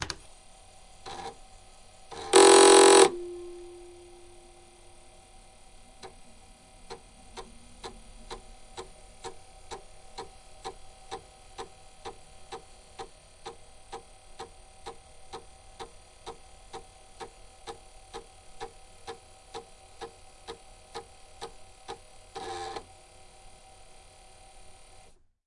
Apple IIe Formatting a 5.25" Disk
This is the classic Apple IIe formatting a ProDOS disk on a 5.25" floppy, using a DuoDisk drive. Recorded with a Zoom H4N.
apple-computer, apple-II, apple-IIe, computing, retro, vintage, vintage-computer